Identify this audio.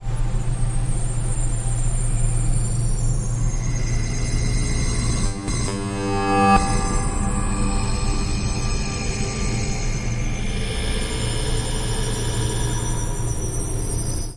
bed w alarm
bed with alarm sound. These Sounds were made by chaining a large number of plugins into a feedback loop between Brams laptop and mine. The sounds you hear
are produced entirely by the plugins inside the loop with no original sound sources involved.
alien, electronic, experimental, generative, processed, sci-fi, sound-effect, soundscape